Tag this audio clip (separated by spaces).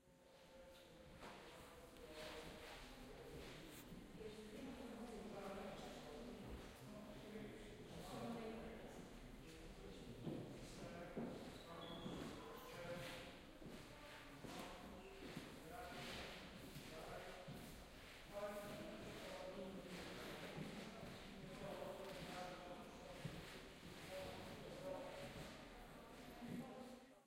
binaural exposition Field-recording guide public-space